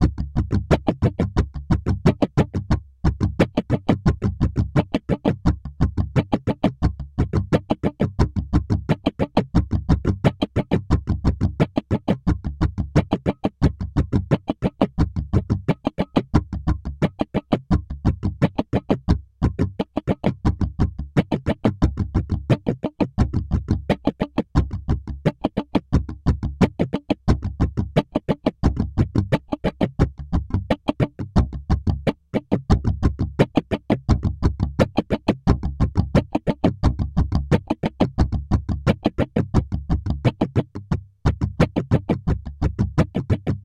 16 Bar Guitar Strum at 88bpm
(use PO-12 018)